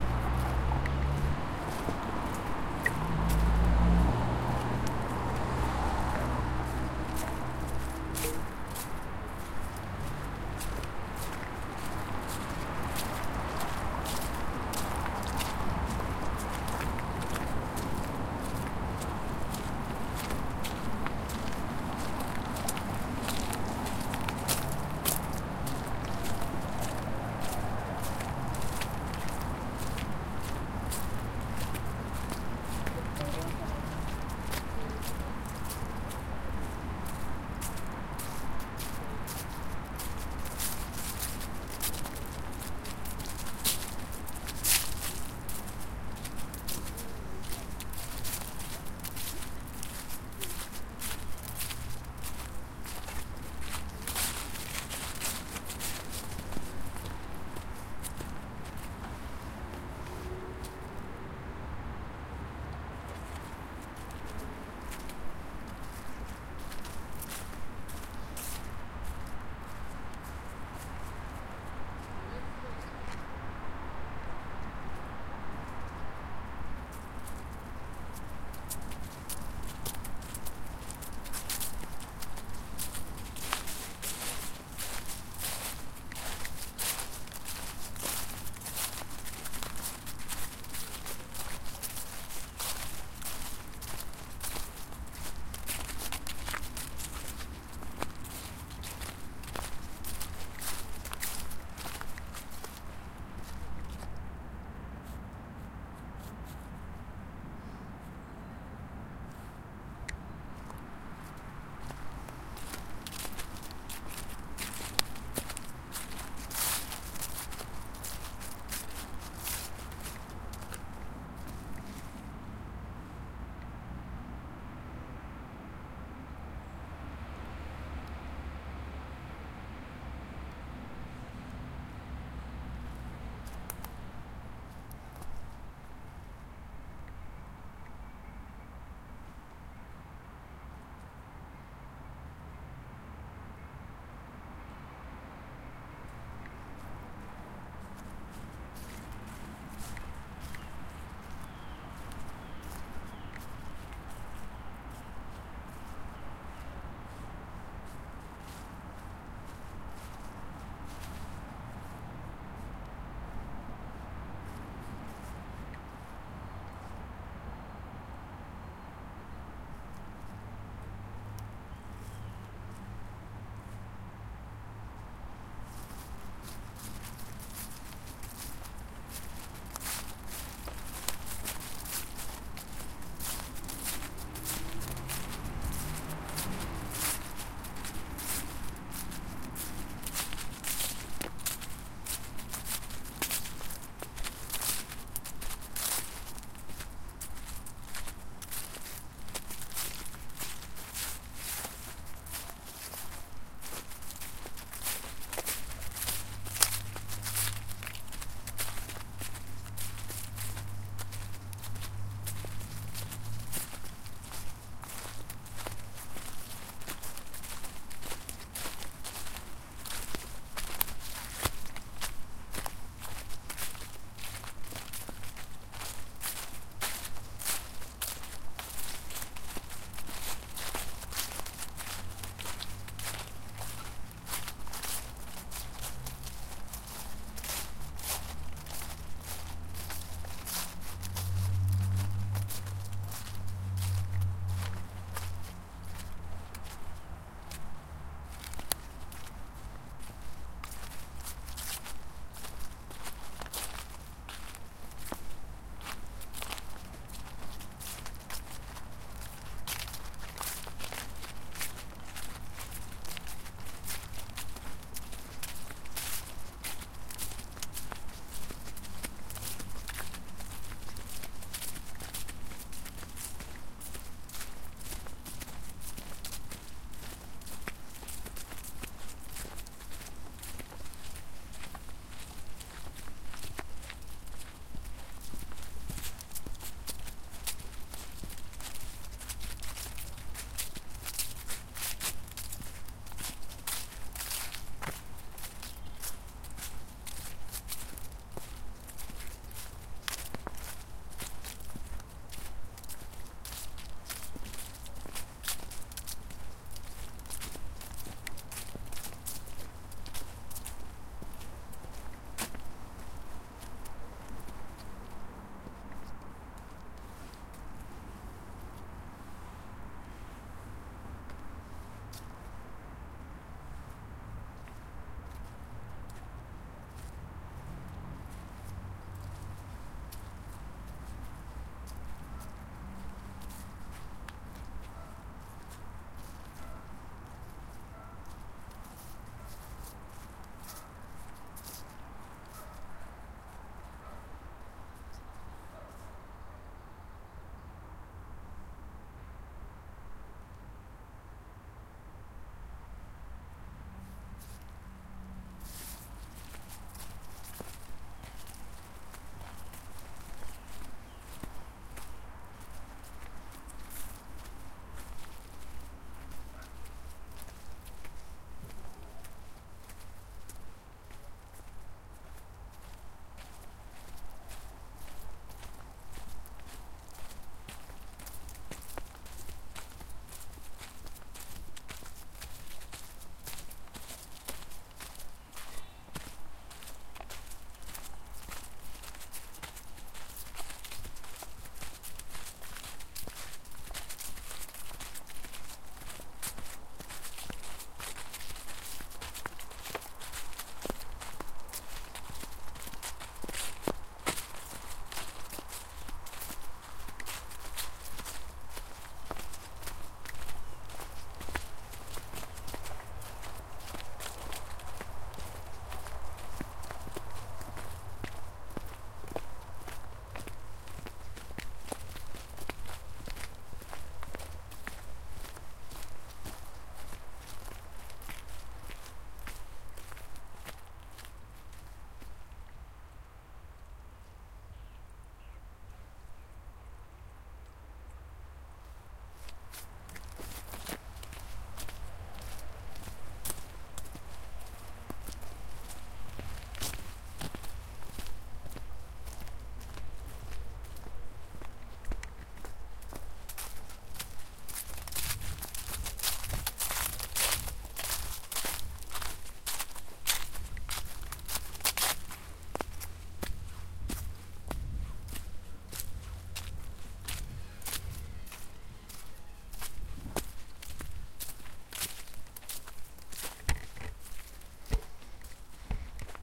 Walking through City Forest, Valdivia South of Chile
Walking through a small forest like park in Isla Teja, the city of Valdivia and south of Chile. Mostly steps in the autumn leaves, there are some cars passing heavily in the beginning (that might be present in the rest of the walk as well)
For the nature nerds:
I believe this small park in the middle of the city does not qualify as a Valdivian temperate rain forest ecosystem, it's name is "Parque Santa Inés" and it's next to Isla Teja's main avenue "Los Robles".